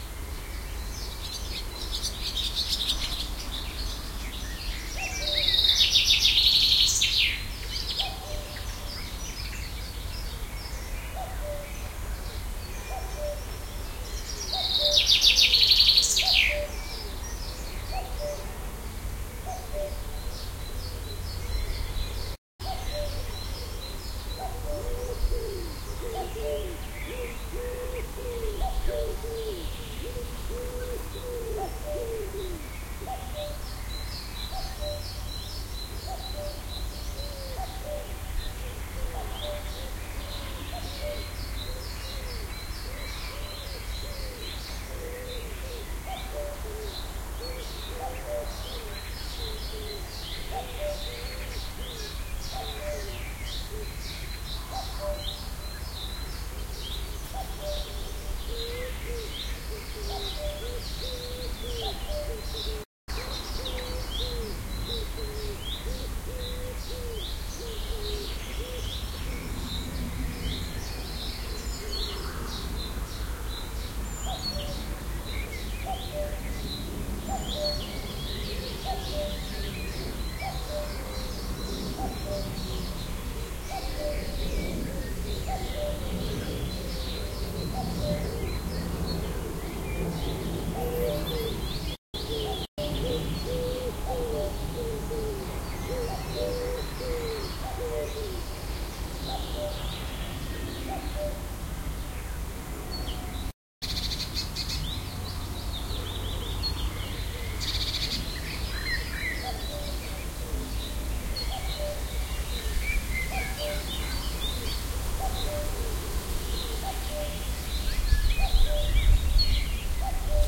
This sample was recorded also on the 28.05.2006 near the city of Esbjerg / Denmark, using the Soundman OKM II and a Sharp IM-DR 420 MD recorder.Except some plane noise towards the end it is a fine recording of a cuckoo and some other birds. There shure is some birdsong in Denmark, like my other sample from a year previous.
field-recording, cuckoo, binaural, denmark